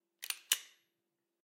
675 pistol being cocked after magazine has been loaded